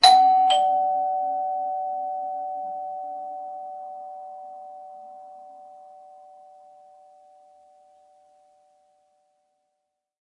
A standard mechanical doorbell. Medium length decay. MORE IN THIS PACK. Recorded with a 5th-gen iPod touch. Edited with Audacity.